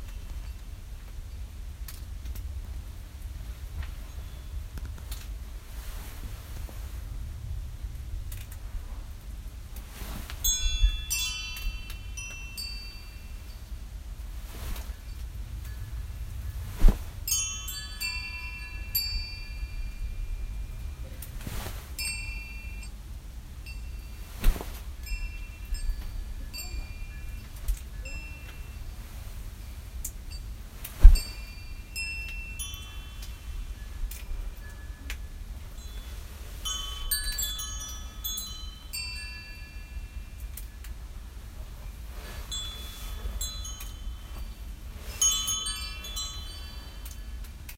wind chimes 04.10.2018 17.21
This sound was record on my country house.
wind, chimes, field-recording